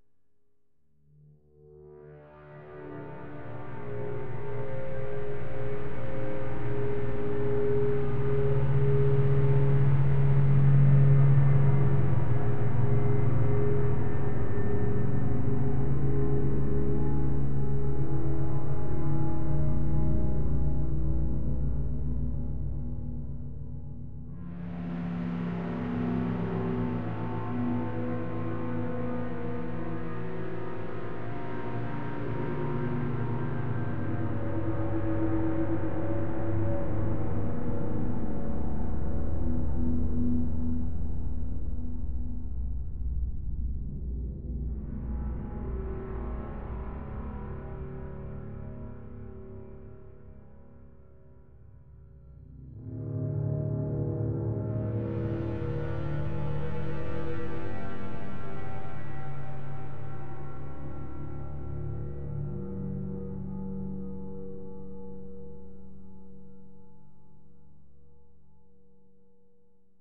archi soundscape space1
Instances of Surge (synth), Rayspace (reverb), and Dronebox (Resonant delay)
Sounds good for scary scenes in outer-space.
ambient, atmosphere, ambience, horror, ambiant, evil, ambiance, outer-space, drone, space, scary, soundscape